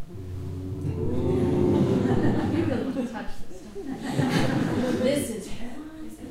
small group laugh 4
A group of about twenty people laughing during a presentation.Recorded from behind the audience using the Zoom H4 on-board microphones.